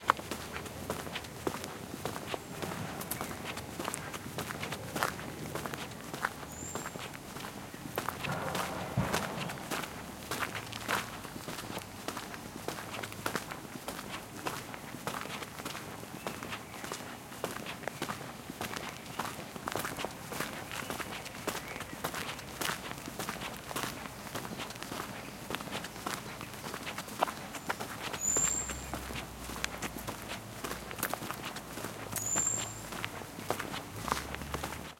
Forrest Birds Walking on path Skodsborg07 TBB
Field recordings in a forrest north of Copenhagen, Denmark.
walking, forrest, Rustling, path, leaves, birds